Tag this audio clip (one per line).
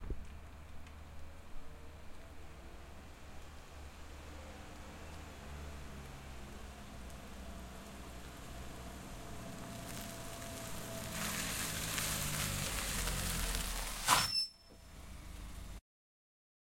break
car
dirt
drive
driving
go
outside
OWI
stop